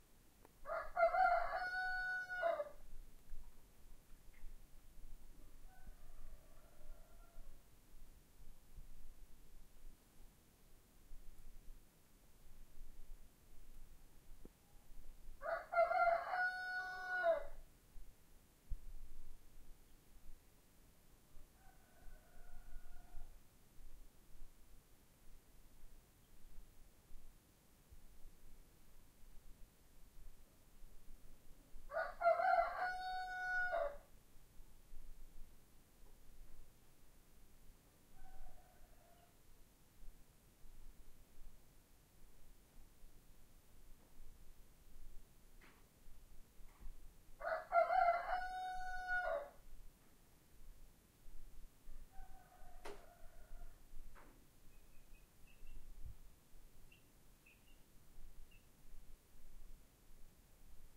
Rooster crowing - far away
| - Description - |
Rooster crowing in the distance
rooster, crowing, wake, chicken, farm, rural